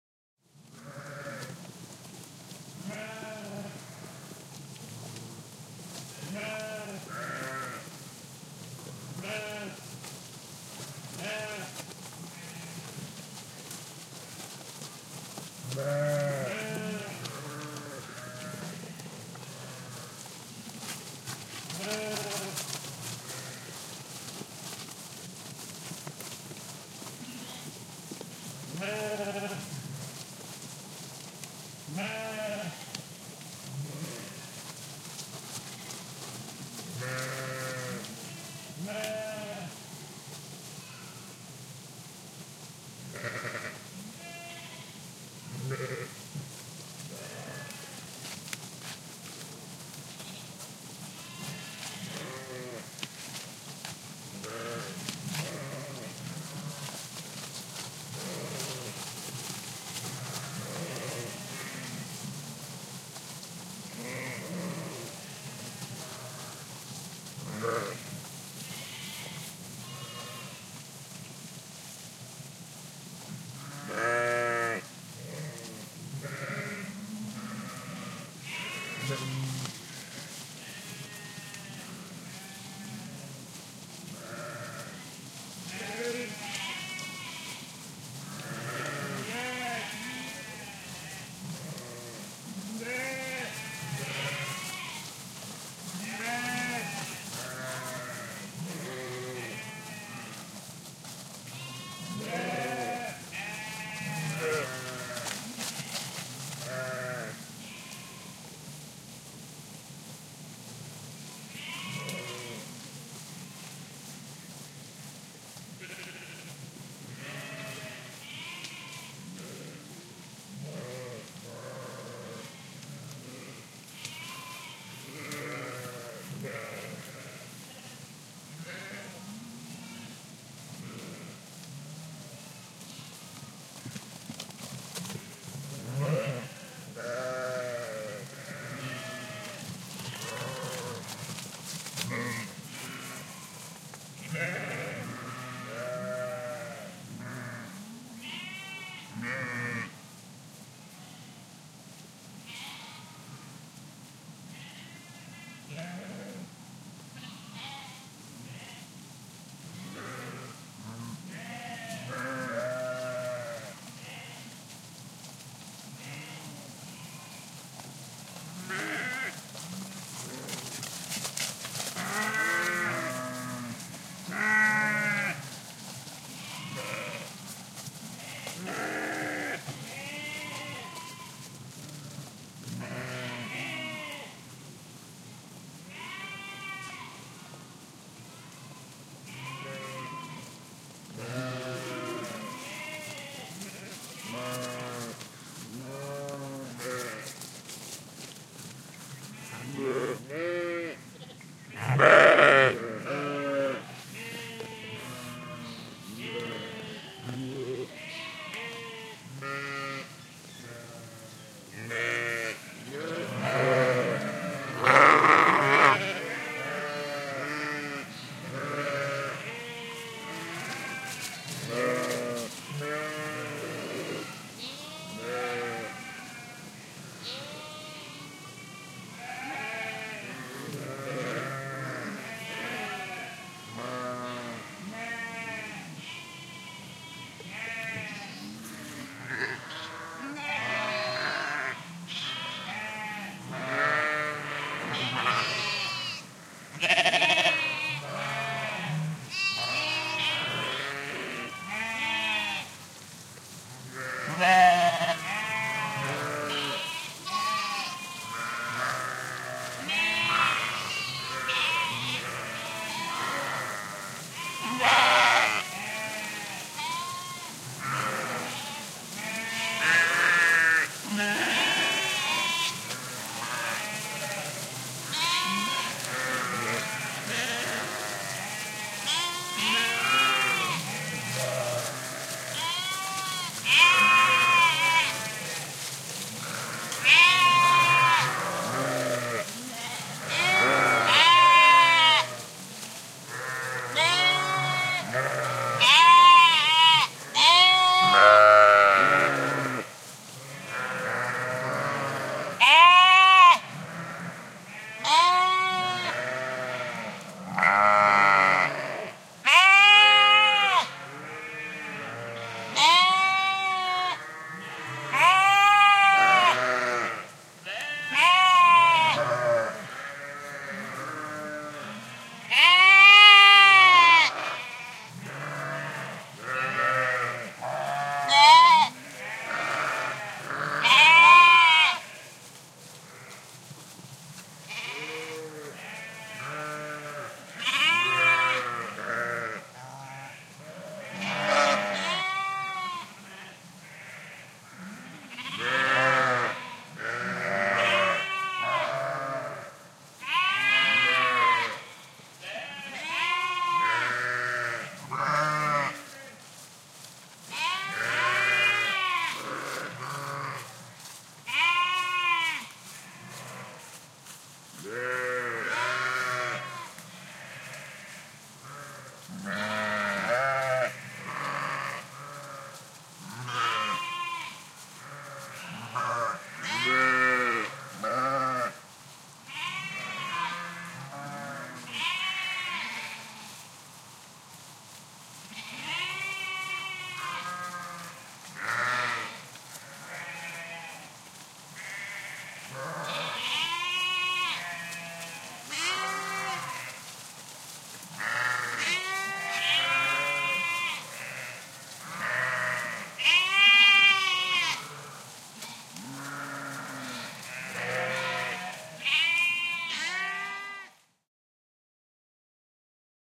Flock of Sheep in Park (English Garden) in Munich
A flock of sheep (150-200 animals) in the middle of the park "Englischer Garten" in Munich. Used regularly there as lawn mowers.
Slowly approaching, surrounding me in the end of the recording.
Vocalisations from young and old animals, as well as movement in the gras.
ambience, animals, field-recording, nature, park, sheep